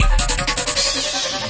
special fx audio
audio, fx, special